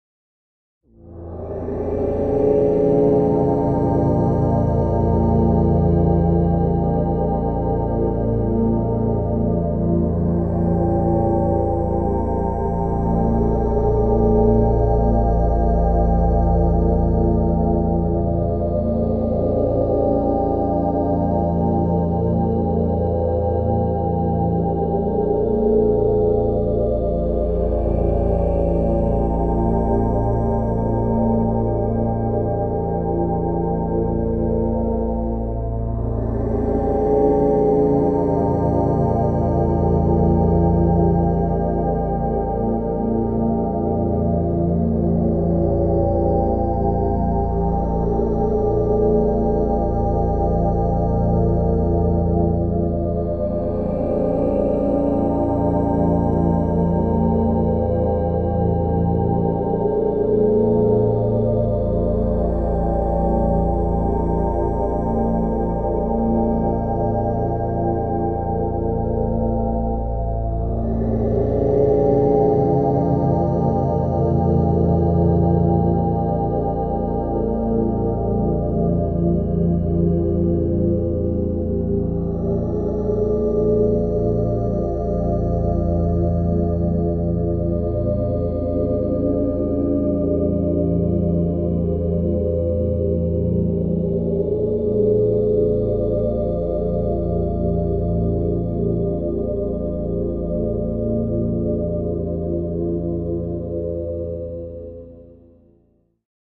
ohm sing cl2 cut1 ms st
Simple, single "Ohm" chant sample by my uncle, processed in Max/MSP (quite basic sample-player-, filterbank-patch) as experiments for an eight-speaker composition.